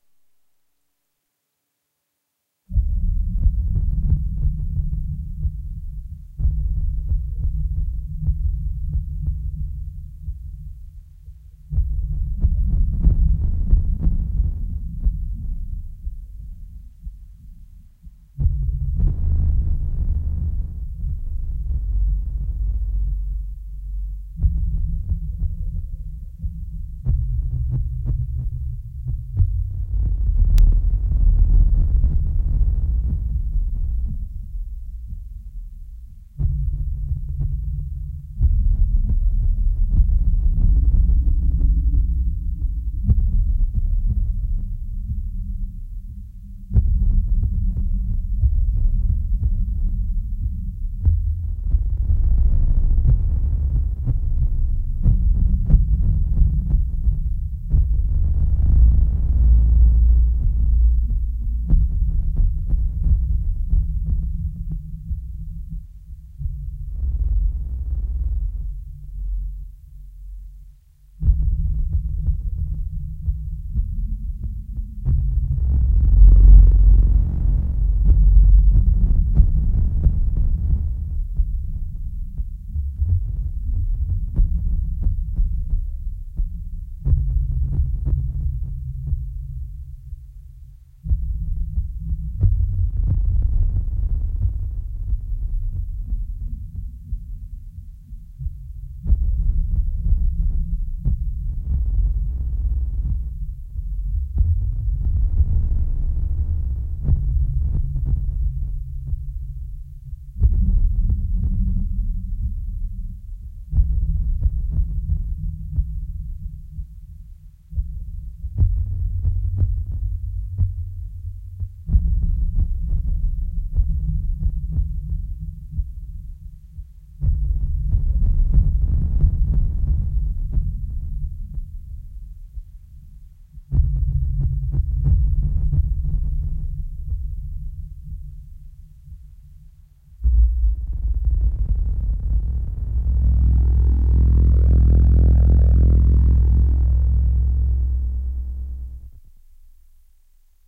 Fleur Schrank
Tripe OSC soundshape
Every bass note cames with his higher pitched brother
Effects: Echo, Reverb and maybe my usual ASIO soundcard bugs.